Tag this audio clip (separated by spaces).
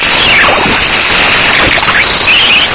bent glitch effects lo-fi circuit